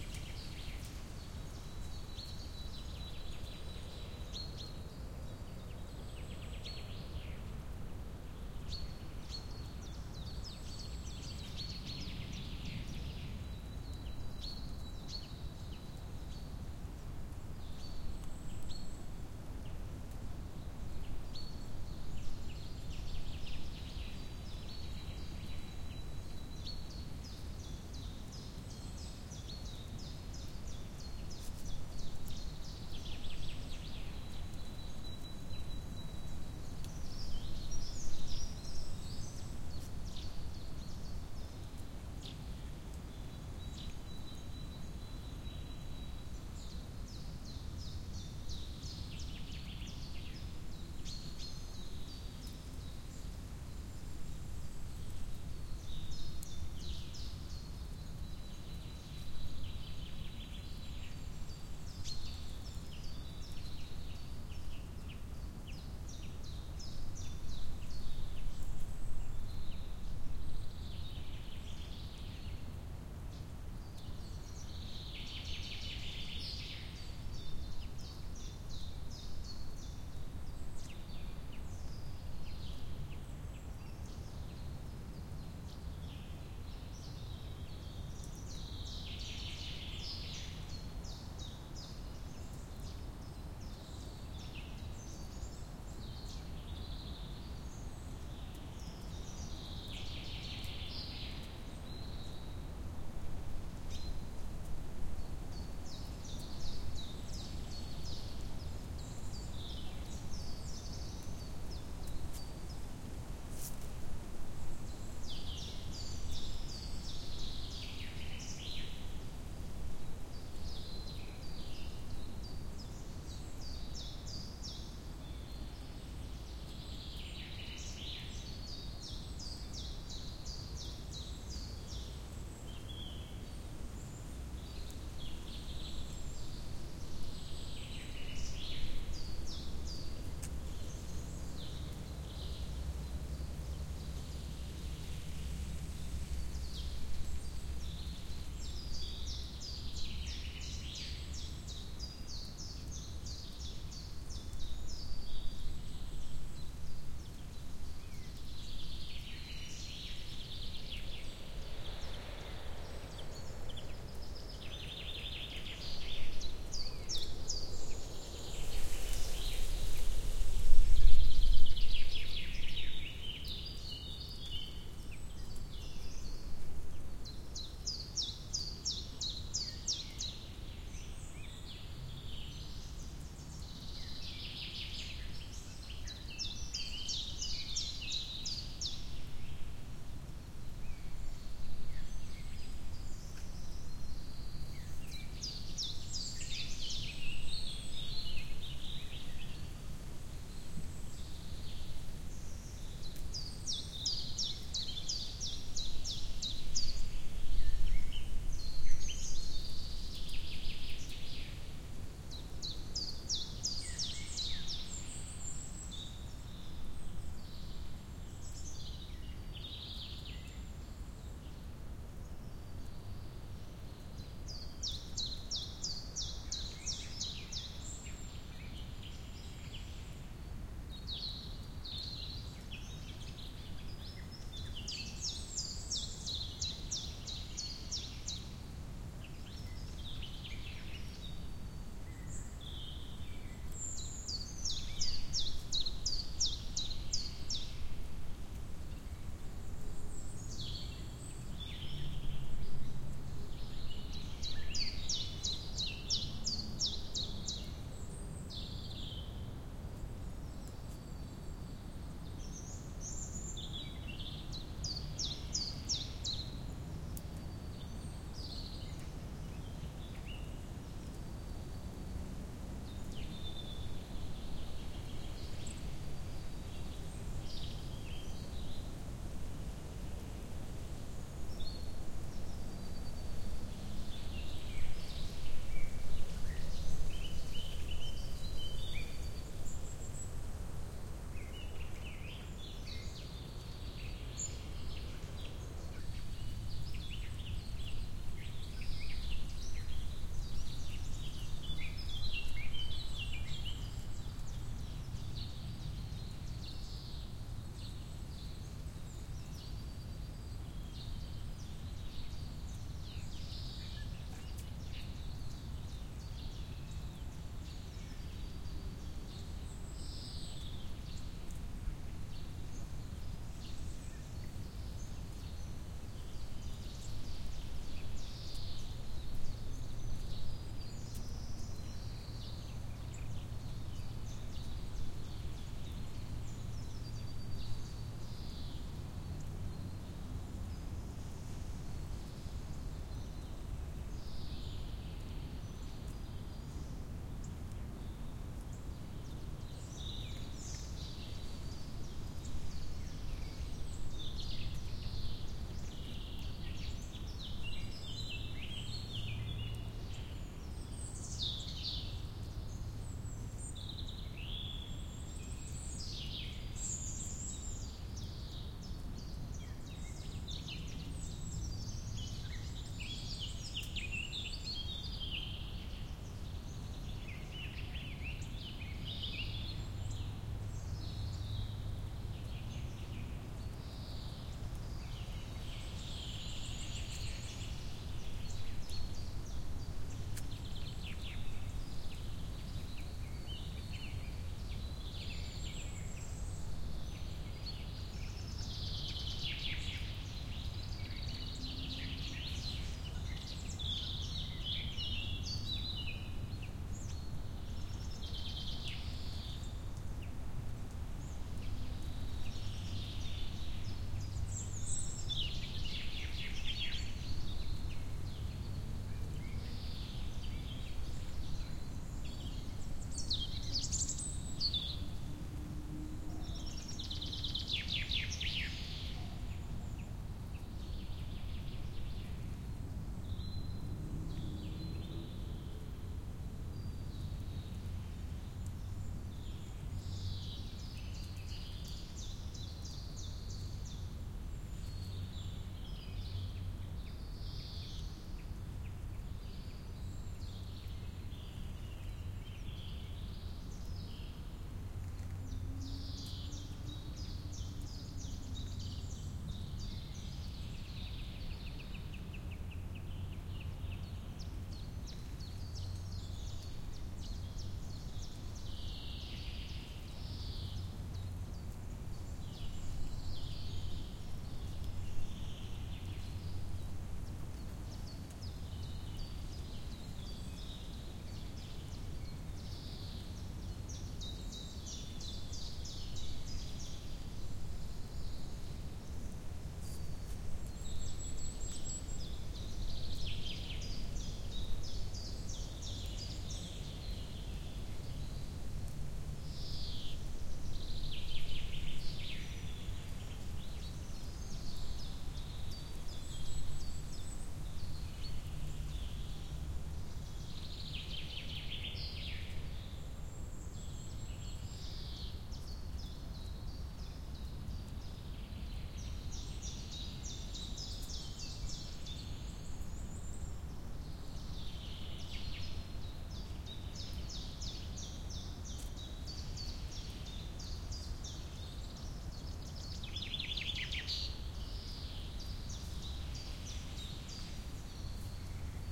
Field recording in the beginning of April in a forest called the Deister in Germany. It was a little bit too windy for those windshields, but the other ones I use were just to big to carry on the walk.